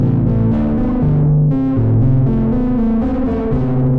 Nord Lead 2 - 2nd Dump
ambient, backdrop, background, bass, bleep, blip, dirty, electro, glitch, idm, melody, nord, resonant, rythm, soundscape, tonal